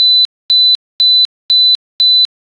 4000 Hz beeps
Annoying beeping. Like an old digital watch alarm.